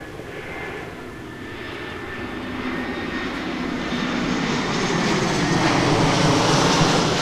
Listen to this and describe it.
avvvviiiiooonnnn fiiiiiuuushhhhh!!
Hear the sound of the plane on Delta del Llobregat. Recorded with a Zoom H1 recorder.
aeroport, airport, avion, Llobregat, plane, sonido